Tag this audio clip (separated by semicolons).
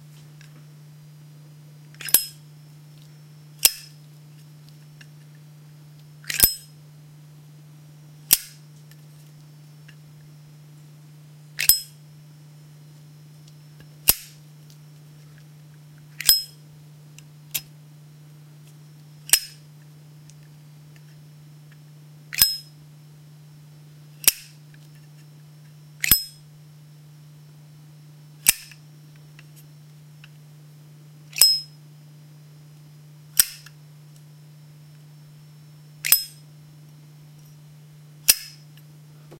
clink; lighter; metal; request; unprocessed